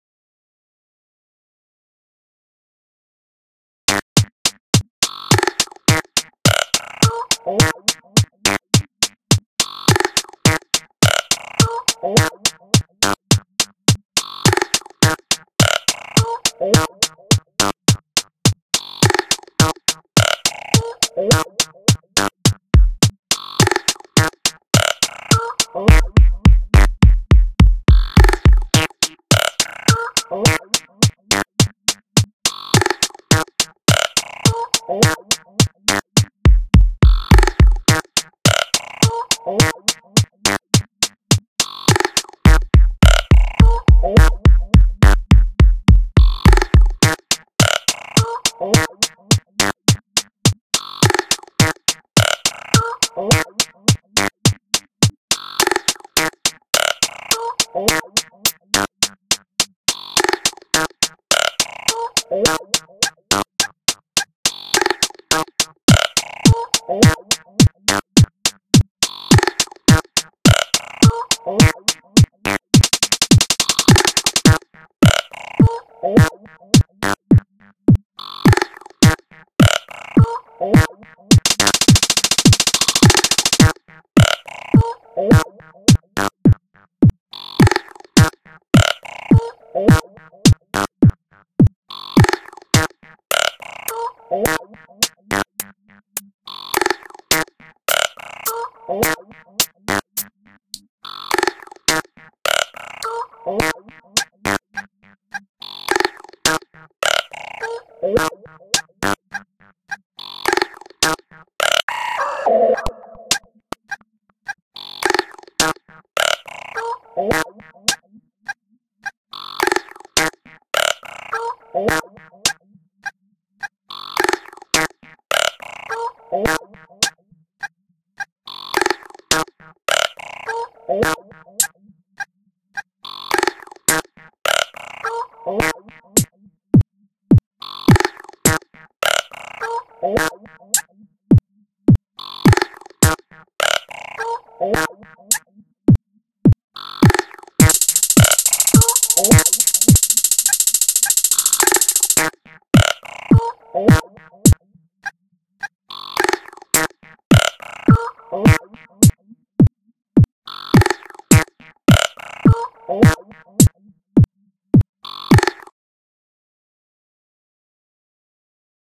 GLITCHY CHICEN
a beat made from a sample of a chicken
beat, drum, chicken